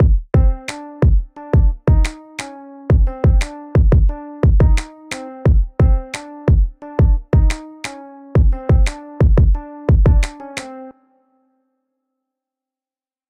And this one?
One more beat with piano by Decent.
Hip-Hop, kick, decent, beat, music, drum, piano, rap